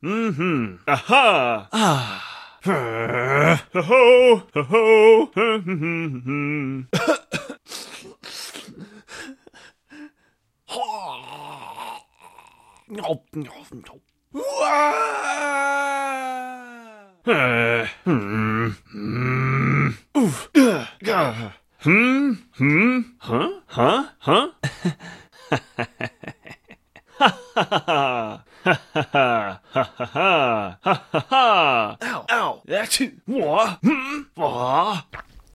VG Voice - Hero
Voice samples for video games I made for a TIGSource game competition using Audacity. They're made to be chopped up and as flexible as possible for a video game.
This one is of a male hero type character. The sample includes no words, just vocalizations like grunts, eating sounds, and hit sounds.
non-verbal, video-game, vocal